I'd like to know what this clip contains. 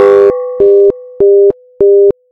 This sound is like you got an answering machine and the church bell rings.
Step by step :
- I create synthesis sounds.
- I duplicate them
- I rhythm them and add amplification.
- I add effect like reverberation.